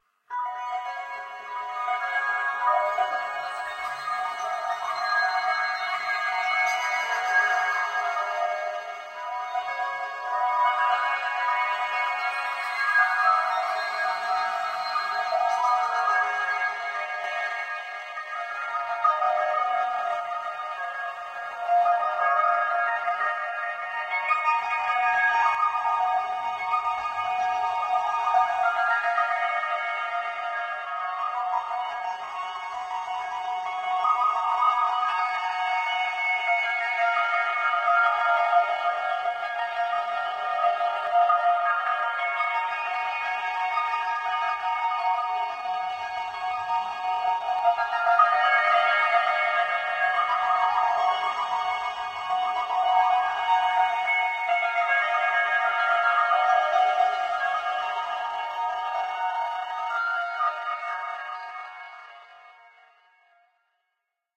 Frenetic TranceFormation
contraction, music, outer, sci-fi, time